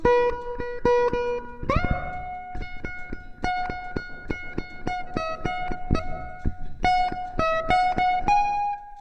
solo loops 1
nice high guitar solo sounds